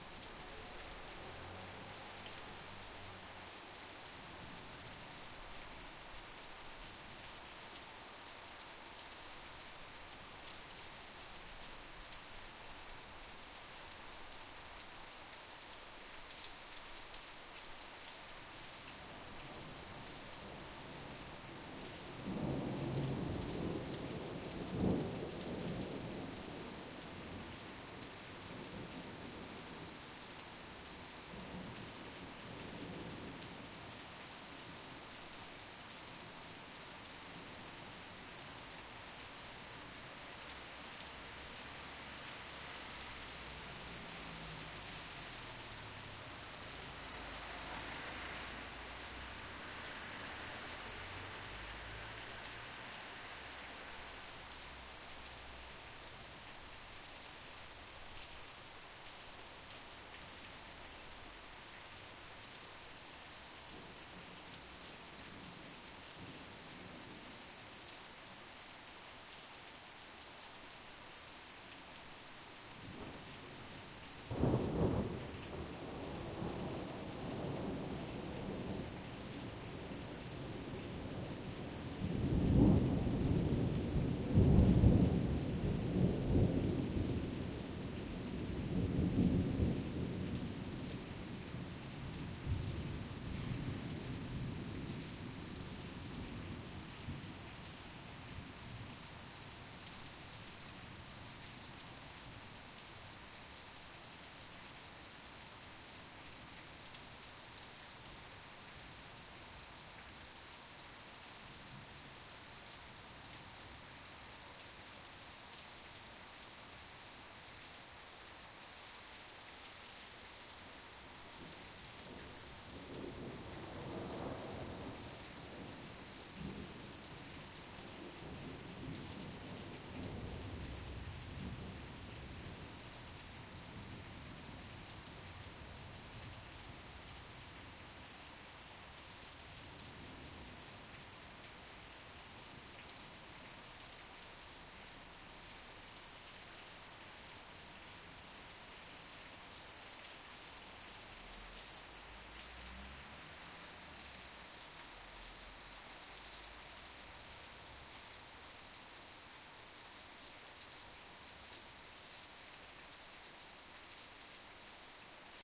light rain on a street and a far away thunder.